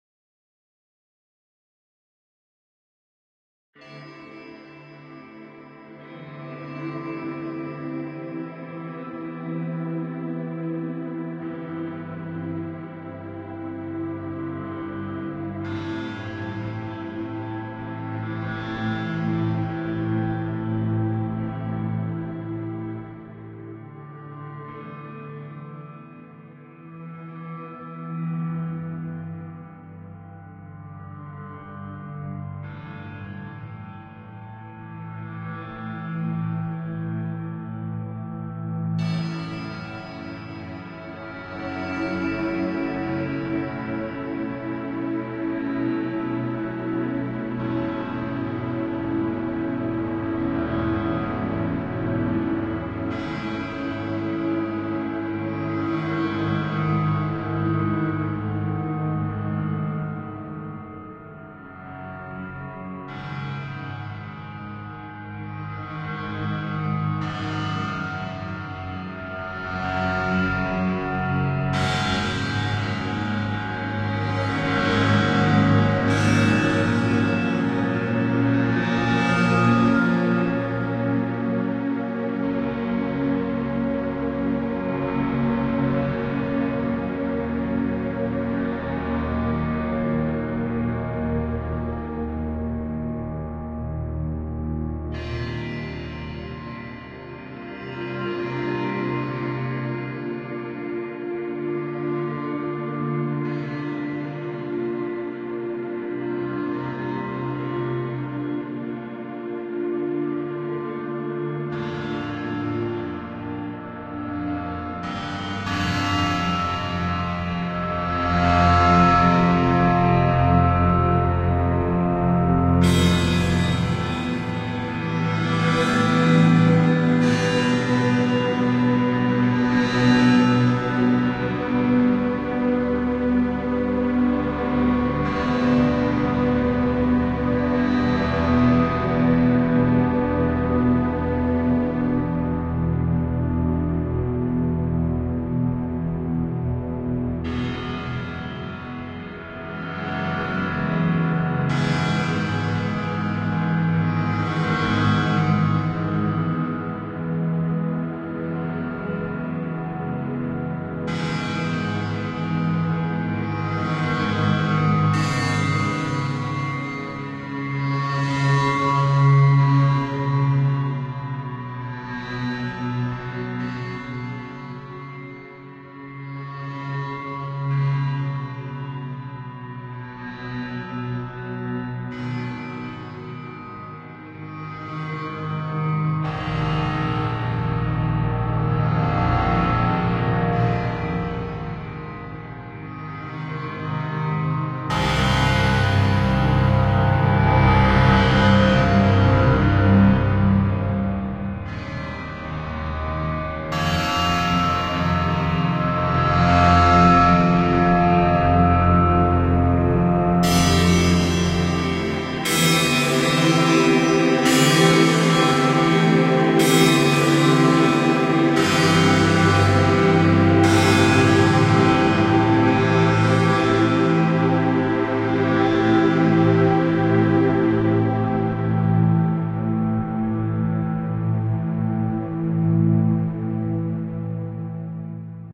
Cymbal's Reverse
A ii, iii, V, vi chord progression with keyboard plugged into Music Maker daw using virtual synthesizer Cymbals Reverse voice and tweaked with parametric equalizer now that I finally learned what that's all about.
meditative, electronic, ambience, sweeping, slow, ethereal, atmosphere, swirling, ambient, moody, pad, strings, cinematic, soundscape, ephemeral, dreamy, synthesizer, synth, flying